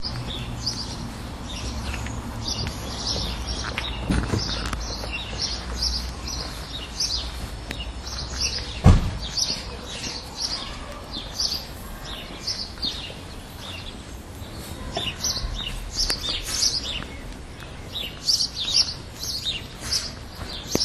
A bunch of sparrows chattering away. Someone gets out of his car and walks off in the background.
Recorded with an Olympus WS-100 voice recorder.
bird, birdsong, field-recording, house-sparrow, sparrow, tweet, twitter